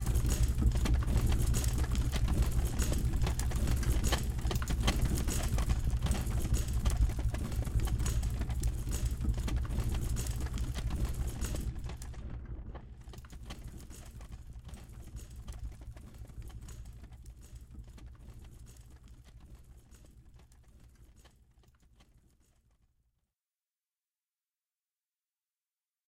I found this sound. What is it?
Chain driven conveyor system loop. Made of bike chain, mechanics' crawler/creeper, and shaking/bouncing VHS tape.
Recorded with Zoom H5 with XY capsule & Oktava MC-012
conveyor, factory, industrial, loop, machinery, noise, plant, rollers